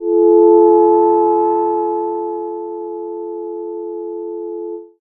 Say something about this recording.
minimoog vibrating G#4
Short vibrating Minimoog pad